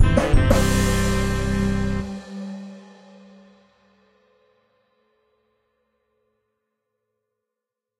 Success Jingle
Jingle indicating success. C Major
Jingle, Stinger, Success, Win